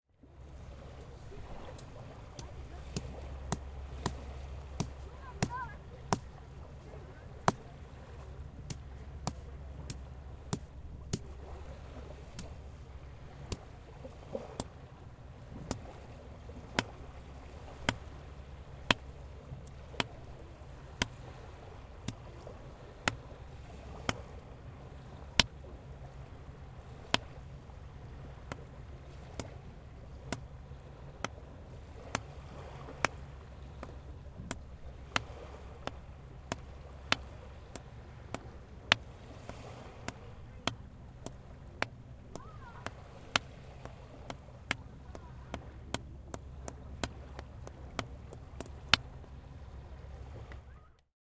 This Foley sample was recorded with a Zoom H4n, edited in Ableton Live 9 and Mastered in Studio One.
Foley, design, field-recording, mic, microphone, movement, nature, rustle, sound